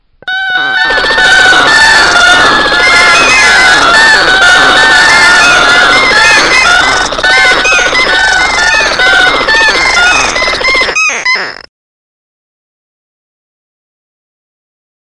The raw material was made with a Honeywell waveform generator, DSP was done in Nero wavePad and final sound was summed in Nero SoundTrax. It took hours so I hope someone likes it
nature, sound, seabirds, birdponds, faked, birds, field-recording